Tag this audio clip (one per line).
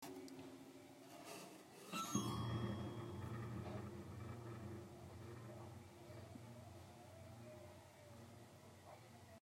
Frightening
Horror
Vibrations
Sinister
Spooky
Piano-Keys
Strange
Scary
Piano
Ghost
Creepy
Old
Keys
Weird
Piano-Vibrations
Eerie